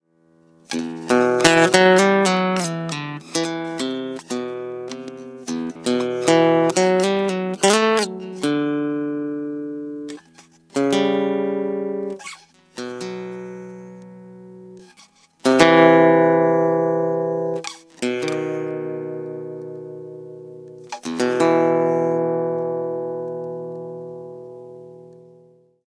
Short guitar riff. Ibanez guitar into FEL Microphone Amplifier BMA2, PCM-M10 recorder